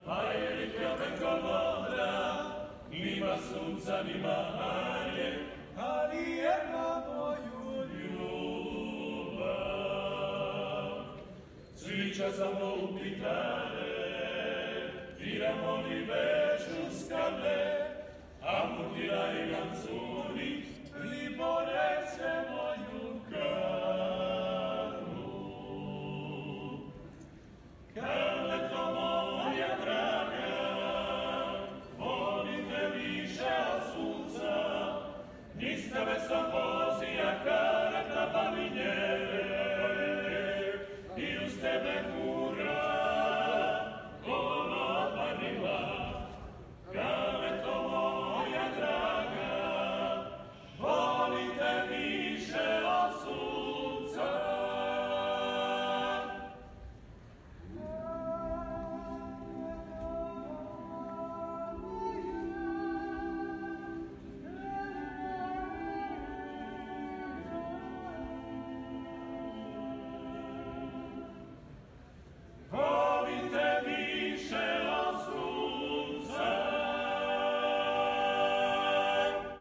DALMATION MEN SINGING
Men from the region of Dalmatia in Croatia, singing Dalmatian folk songs.
OWI, FIELD